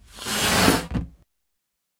Balloon-Inflate-01
Balloon inflating. Recorded with Zoom H4
balloon, inflate